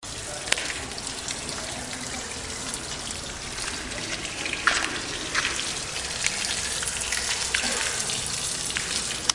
Lluvia Suspenso
Lluvia de cueva, Suspenso
juego, Video, Ambiente